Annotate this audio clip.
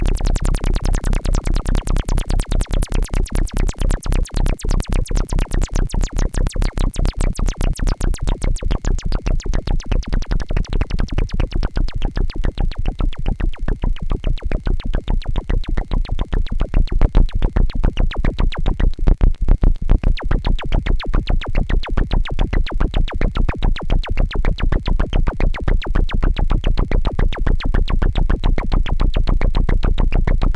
sounds created with modular synthesizer